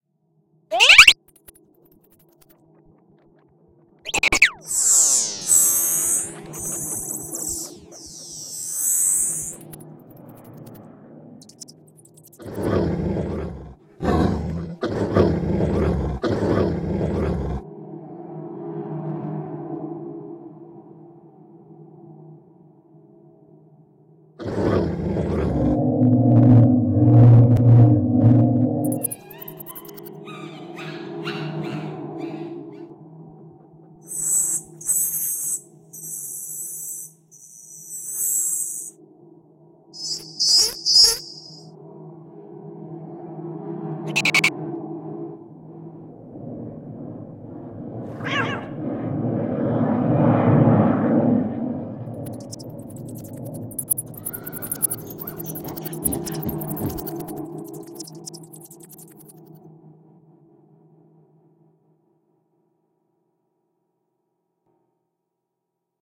Mystical Creep
creep, landing, mystical, paranoia